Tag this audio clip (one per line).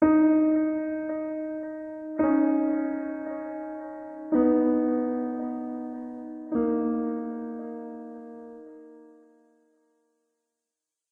piano
classic
delay
reverb
chord
progression
phrase